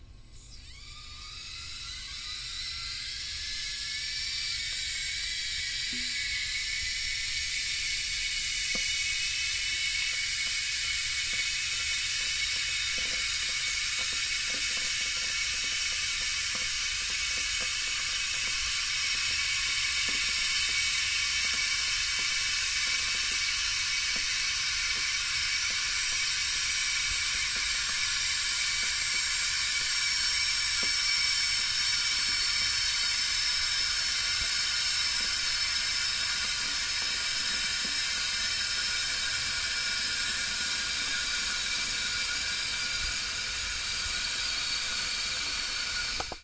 Electric Kettle Shriek
An electric kettle starting to boil - it has a high-pitched shrieking effect great for layering into sound design. I've used this in a couple horror trailer pieces. Enjoy!
Recorded with a Zoom H4n.
coffee, sound-design